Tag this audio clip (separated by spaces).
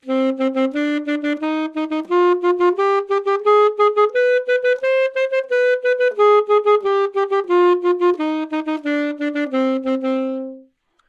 alto Cminor scale